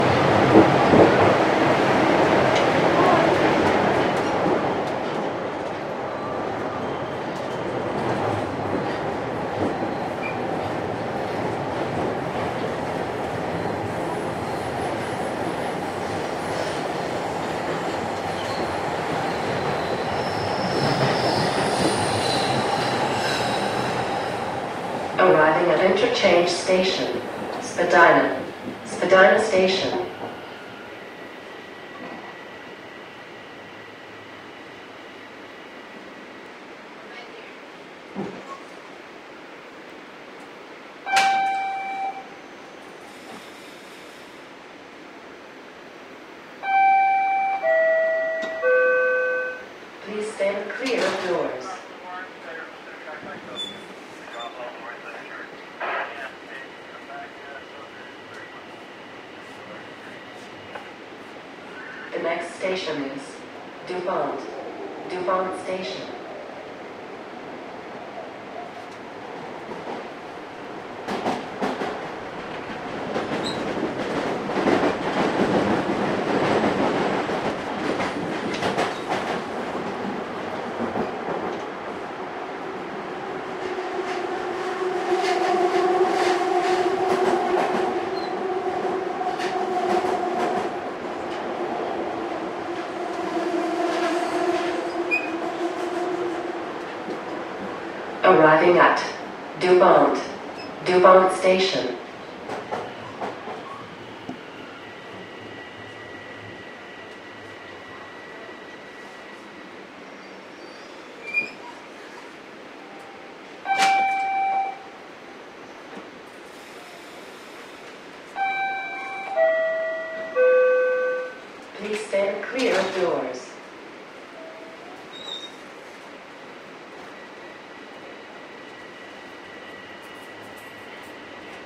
Field recording aboard one of he new Toronto subway trains between St George and Dupont, 30 Apr 2012. Recorded with a H4N with home-made wind screen.
field-recording, canada, subway-train